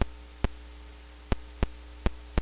Click C;ick
Ah Gee..... These are random samples I recorder and am such a lazy I don't want to sort them out....
1 - Could be my Modified Boss DS-1 Distortion Pedal (I call it the Violent DS - 1) (w/ 3 extra Capacitors and a transistor or two) Going throught it is a Boss DR 550
2 - A yamaha Portasound PSS - 270 which I cut The FM Synth Traces too Via Switch (that was a pain in my ass also!)
3 - A very Scary leap frog kids toy named professor quigly.
4 - A speak and math.......
5 - Sum yamaha thingy I don't know I just call it my Raver Machine...... It looks kinda like a cool t.v.